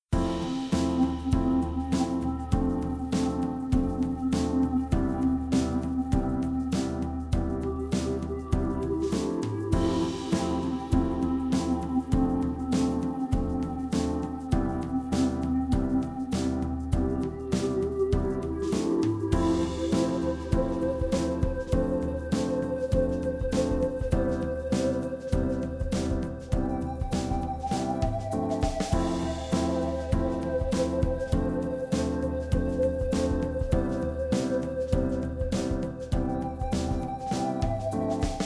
Casual Loop #1
A calm piano loop suitable as music for video clips, or anything you like really.